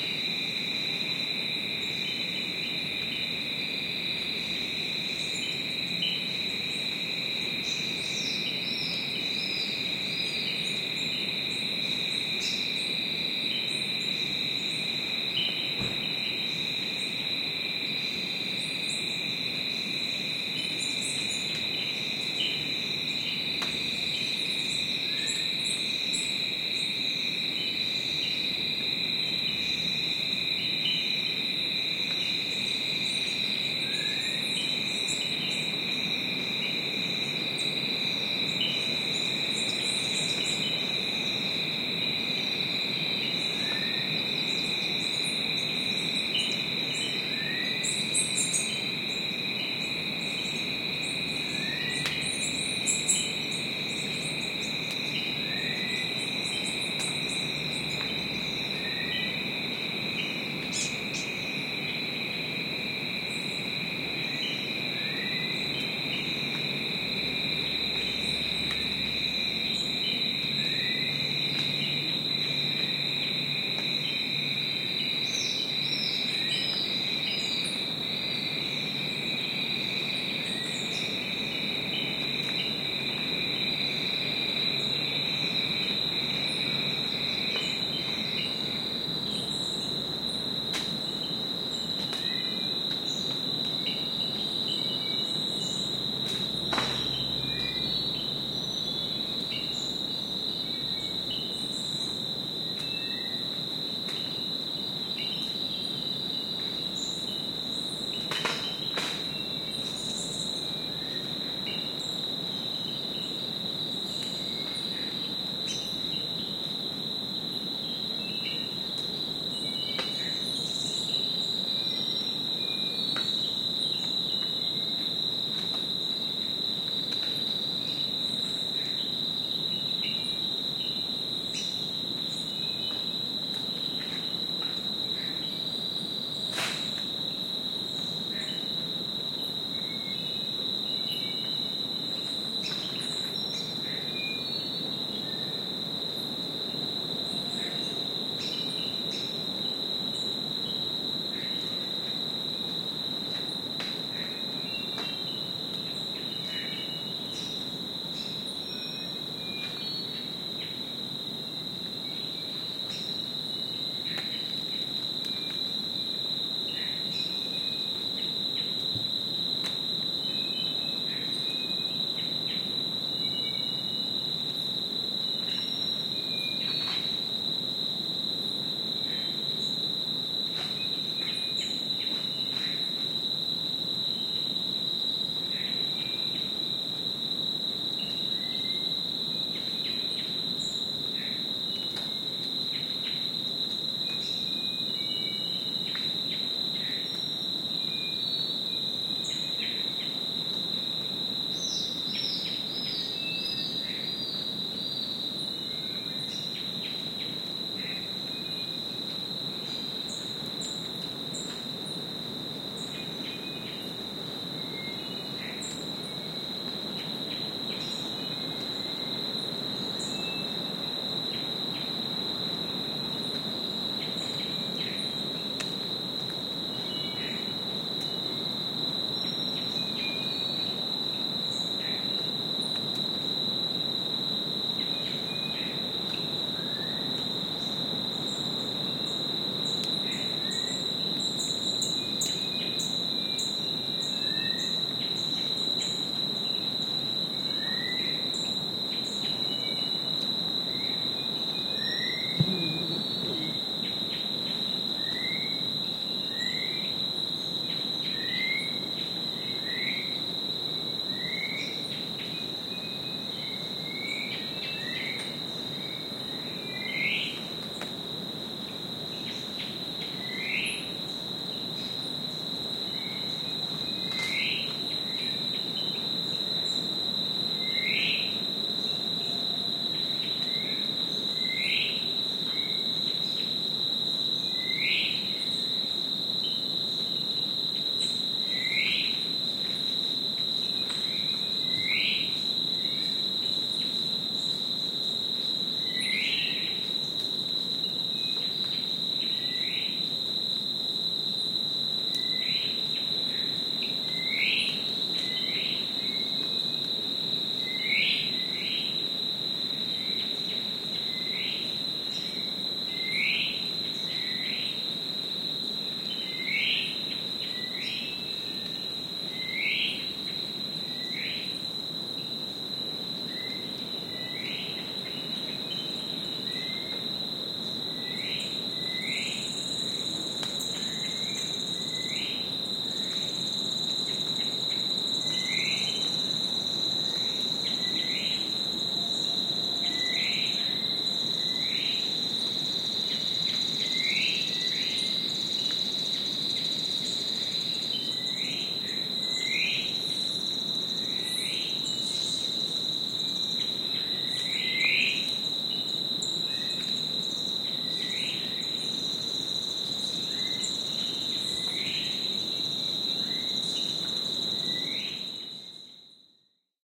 cr cloud forest dusk 01
An ambient field recording from the cloud forest near Monteverde Costa Rica.
Recorded with a pair of AT4021 mics into a modified Marantz PMD661 and edited with Reason.